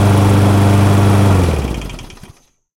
The sound of a lawnmower shutting down.